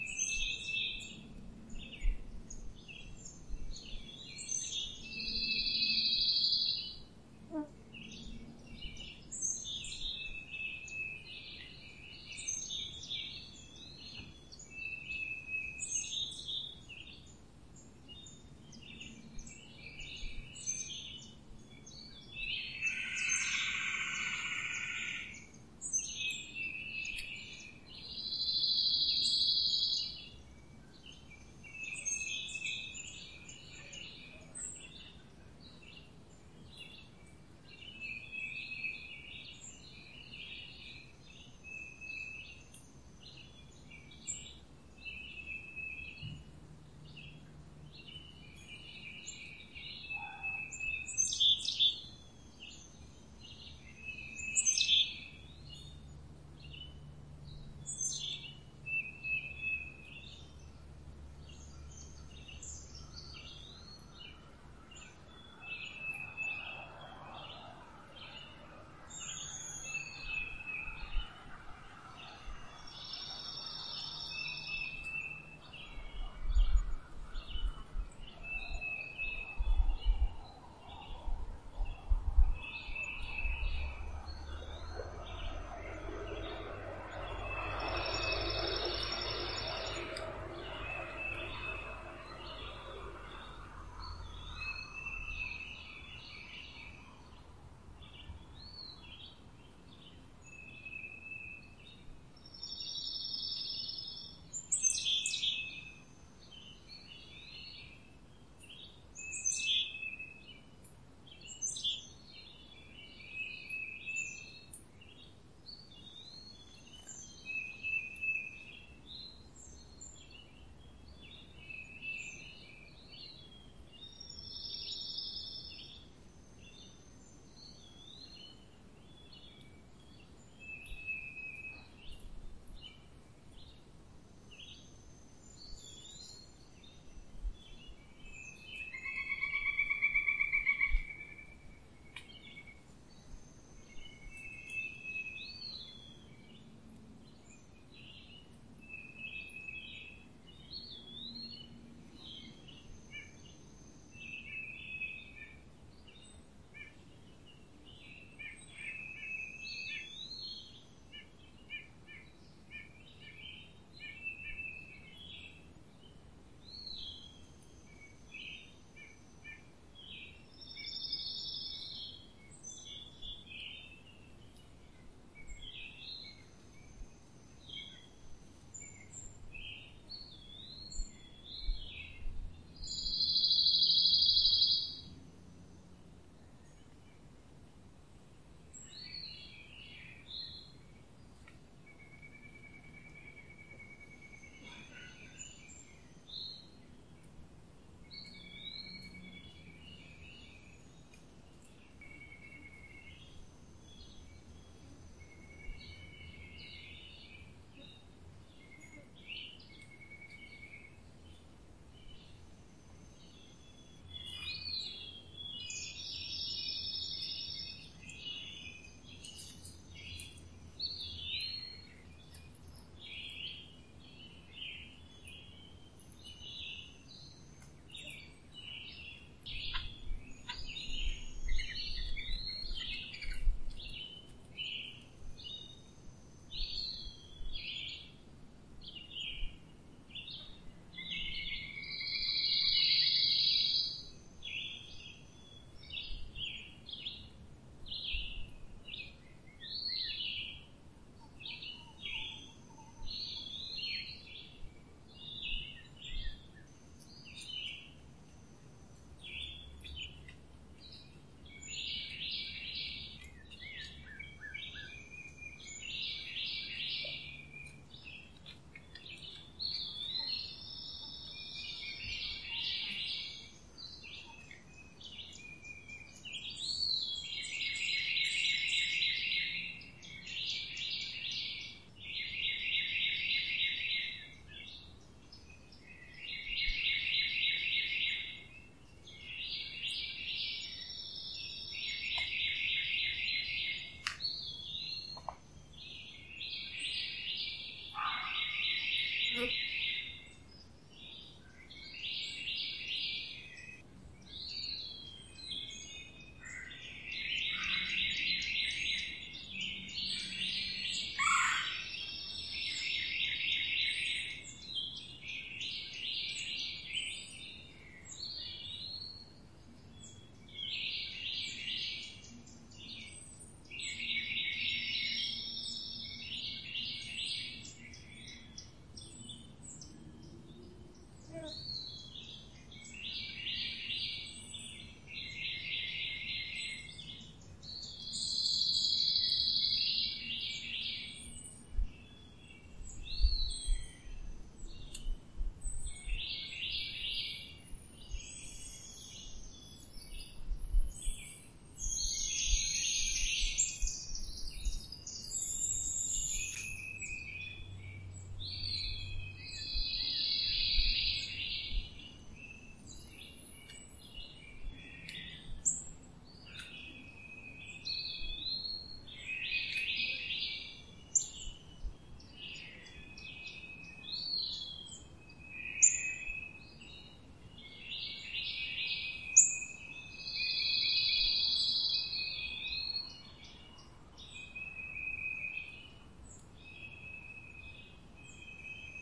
Backyard without dogs
My backyard in the woods in Athens, Georgia. I edited out the neighbor's dogs barking and a passing plane. Recorded with a TASCAM DR40.